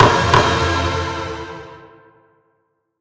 Crime and Chaos
Dun-dun! A meager attempt at re-creating a sound design popularized in Law and Order and parodied in Adventure Time, Community and probably others.
Used Delay, Paulstretch, Pitch shift and Reverb effects. Edited with Audacity.
Plaintext:
HTML:
bang, cinematic, climactic, climax, decision, discovery, echo, epic, gravel, hammer, hit, judge, judgement, law-and-order, revelation, scene-change, screen-fade, tension, transition, wood, wooden